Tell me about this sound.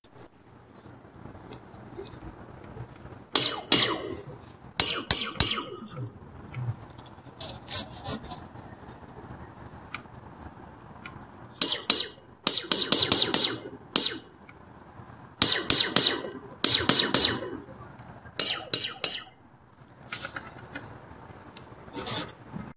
Another of the recordings I did on my phone at work of a steel cable at tension between phone poles. Hitting it with a wrench and making laser sounds. Cleaned up in audacity as the other one was. One is better than the other but can't remember which one I liked the best. If you use it for something and have the time to tell me where it ended up, that's cool. Either way, enjoy.